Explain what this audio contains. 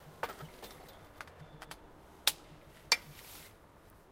20141118 bike lock clicking H2nextXY
Sound Description: a bike lock was closed
Recording Device: Zoom H2next with xy-capsule
Location: Universität zu Köln, Humanwissenschaftliche Fakultät, Herbert-Lewin-Str. in front of the IBW building
Lat: 50.93361
Lon: 6.91094
Date Recorded: 2014-11-18
Recorded by: Rebecca Will and edited by: Darius Thies
This recording was created during the seminar "Gestaltung auditiver Medien" (WS 2014/2015) Intermedia, Bachelor of Arts, University of Cologne.